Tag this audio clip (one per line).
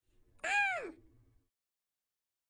Woman
Foley
Fall